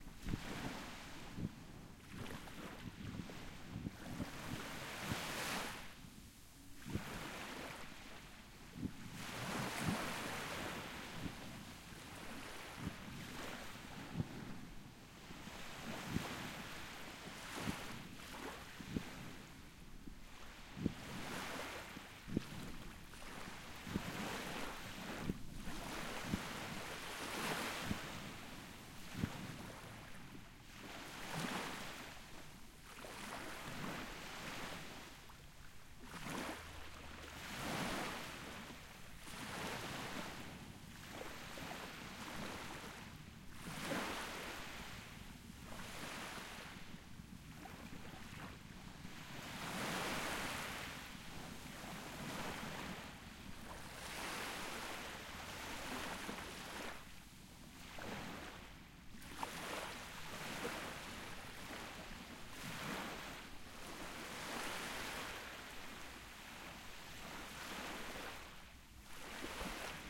Waves and distant fireworks at night
Waves at the beach with distant fireworks.
Recorded with a Zoom h4 in summer 2005
waves, water